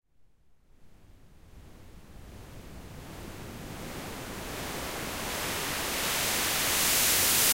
A raiser made with 3xOsc on FL Studio 11.